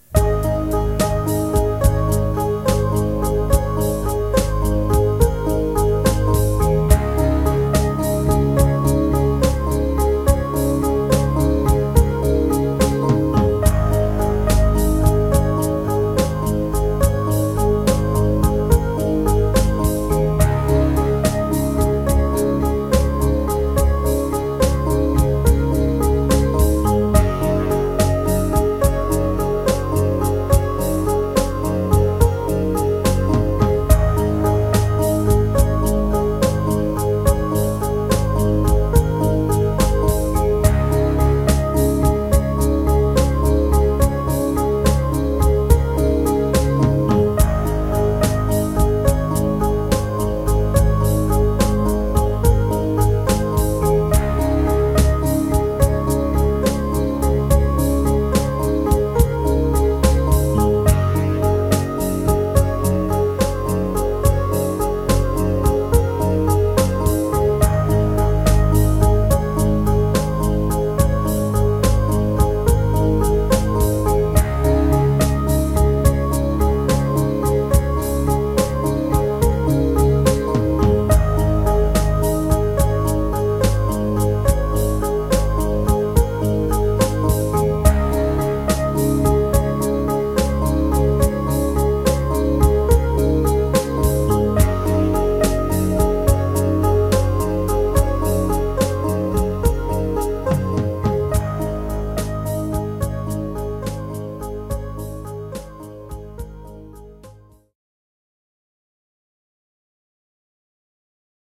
An unused music/drum/keys loop....very cool